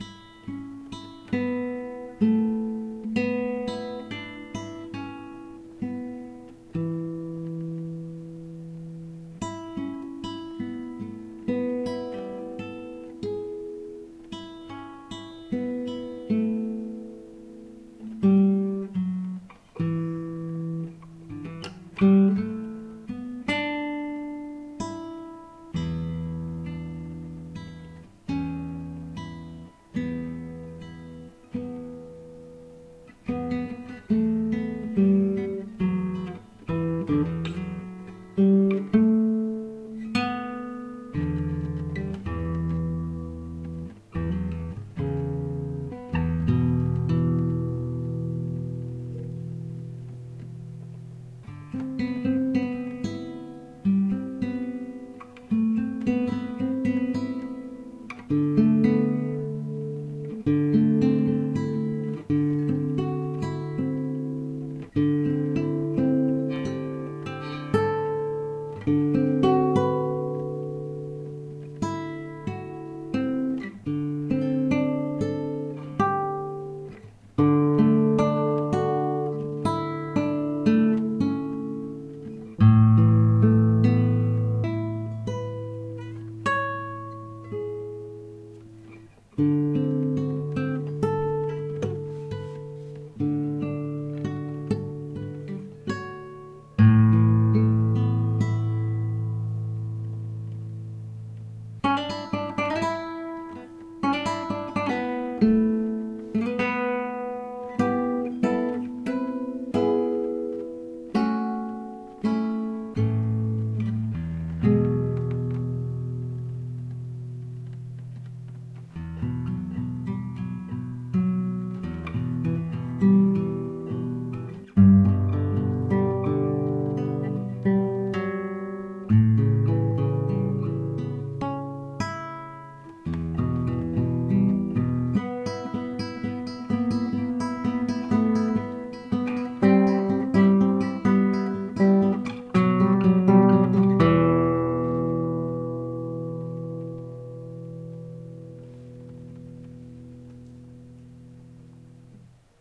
Yamaha C-40 nylon acoustic guitar.